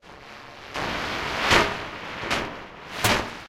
dub drums 013 overdrivedspacebrushes
up in space, echomania, crunchy